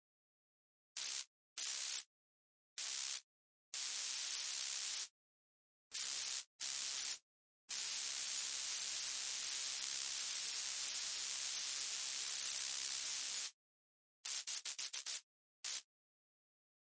Static synthesised with Vital
static, electricity, voltage, laboratory, electric, vital, synthesis, spark, arc, tesla